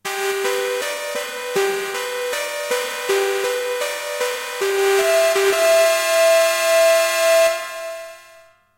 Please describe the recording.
Me direct rendering dramatic stabs and swells with the Neumixturtrautonium plug-in for use in as scene transitions, video game elements or sample loops.
soundscape, trautonium, swell, loop, stab, vst